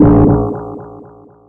semiq fx 5
abstract, bakground, effect, freaky, future, fx, sci-fi, soundesign